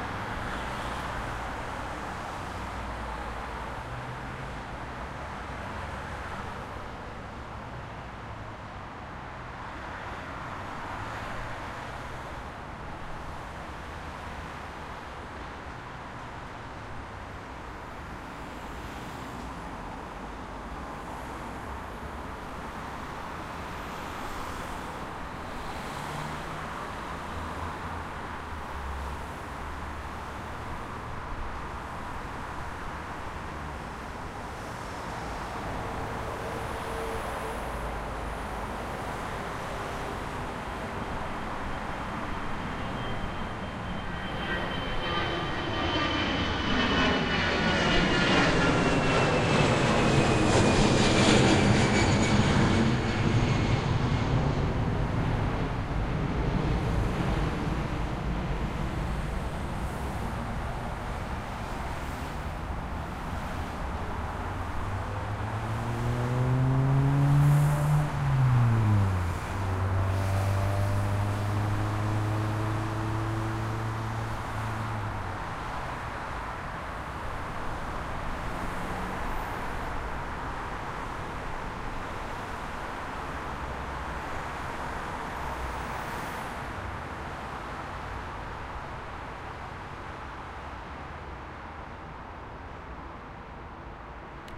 Motorway-Traffic-Jet-Airliner-Flyover
Motorway jubnction with overfling airliner
Jet, Motorway, Jet-Arliner, Traffic, Airplane